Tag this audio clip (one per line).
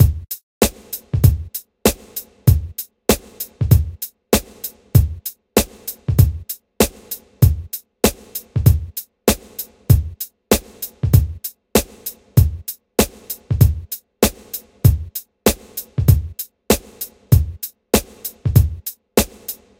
beat
drum
hip
hop
loop
sample